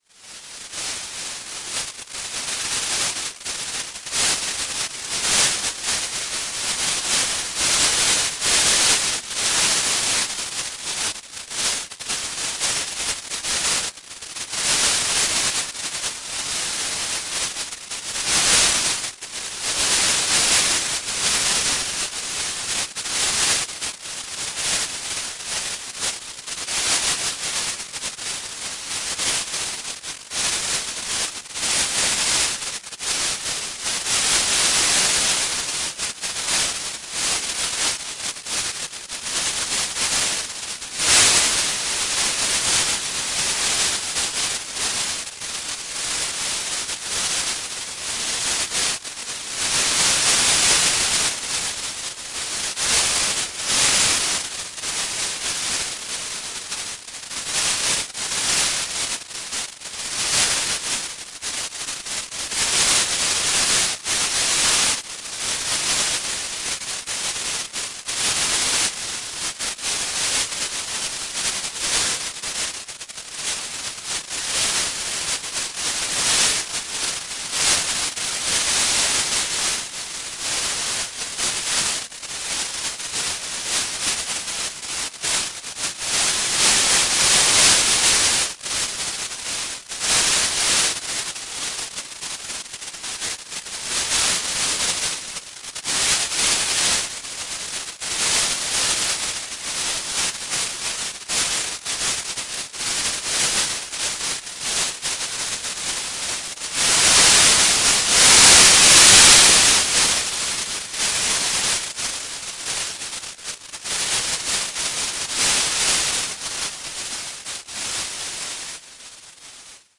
Noise Garden 17
1.This sample is part of the "Noise Garden" sample pack. 2 minutes of pure ambient droning noisescape. The noise of moving things around part 2.
drone, noise, reaktor